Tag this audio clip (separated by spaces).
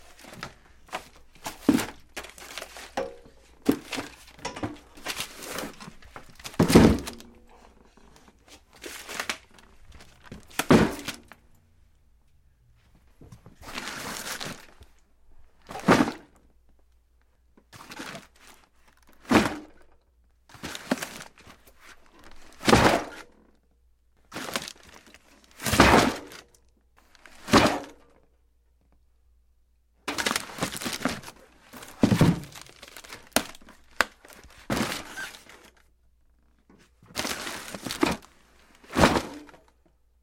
boxes cardboard down pickup put